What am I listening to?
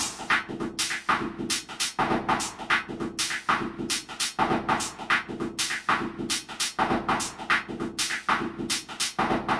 Nexsyn Shuffle Snare
Simple synthie beat made with Nextsyn-AU for Mac OSX in Garage Band.